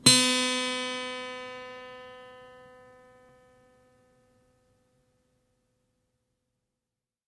student guitar twang g
Plucked open strings at bridge on an acoustic small scale guitar, recorded direct to laptop with USB microphone.